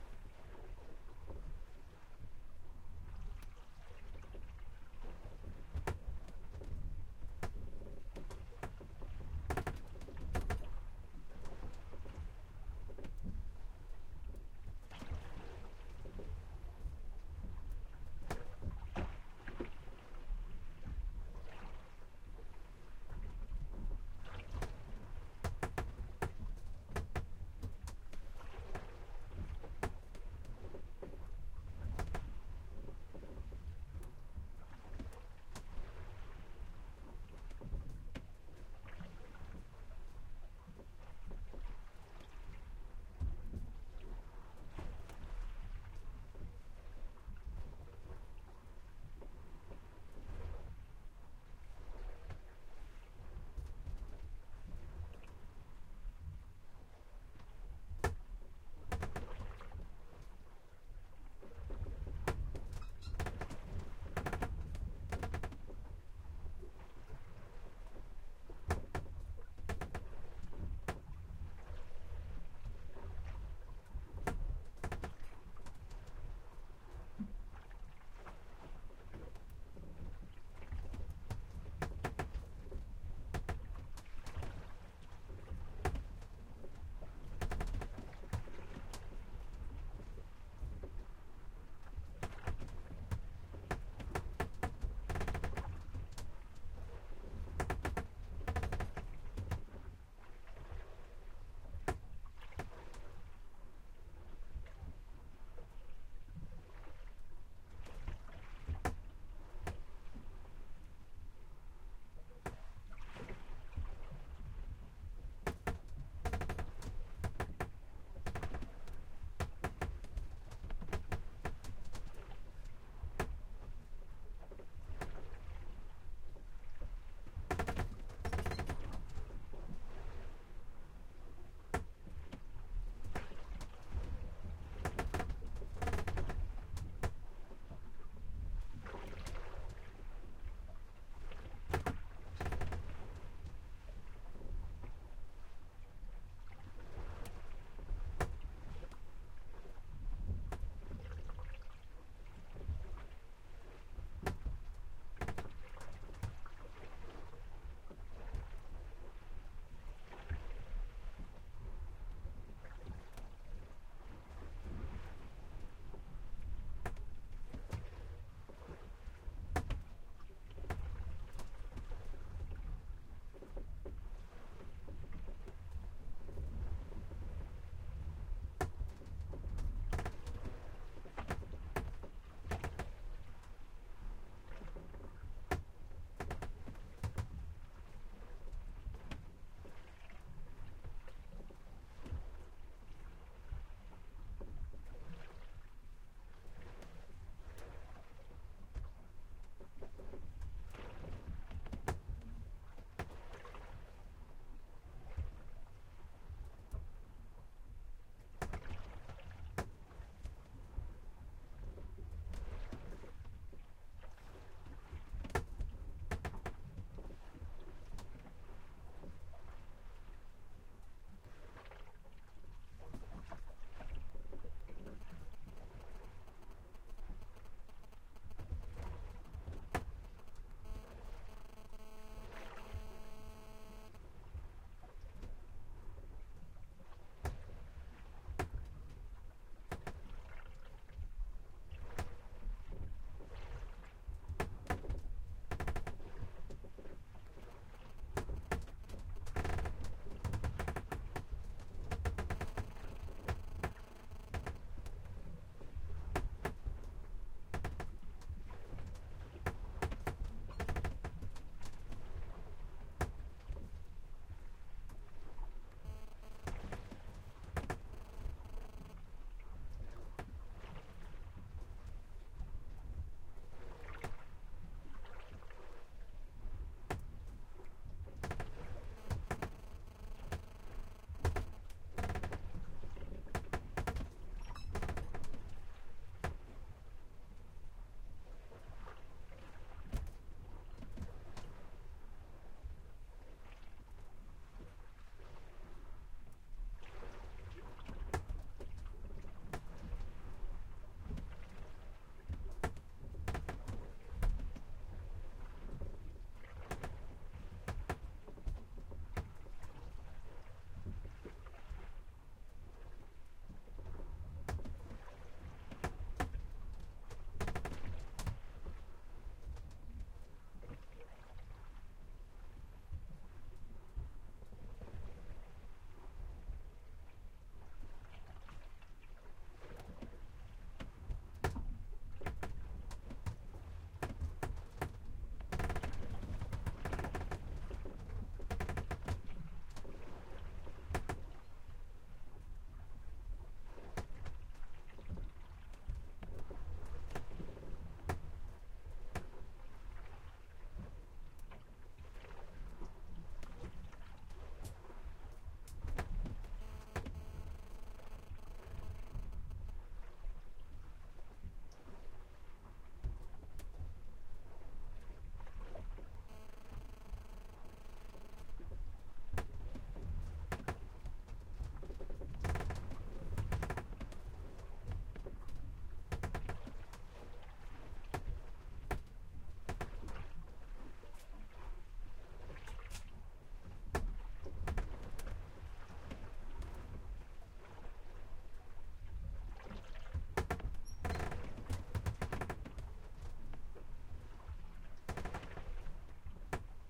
Sailboat Sailing Interior 1
Recorded with a Sony PCMM10 below the waterline aboard my sailboat off the east coast of the US.